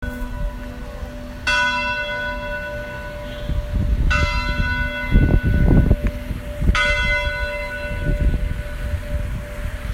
Large hourly bell ringing